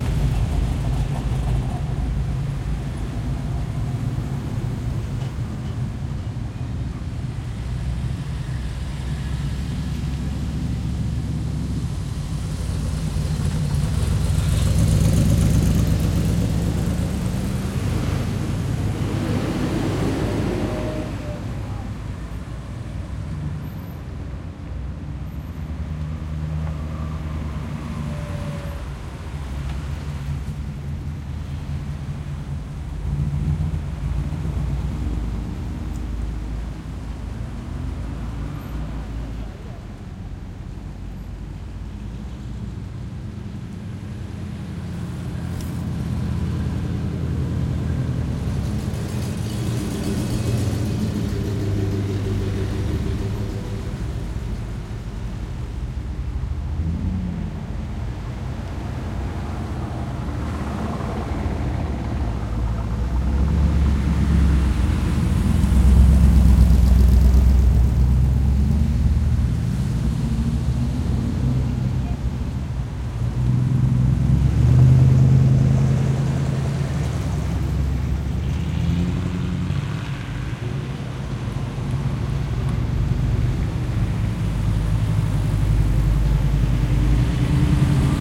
Old cars passing by in a crusing run.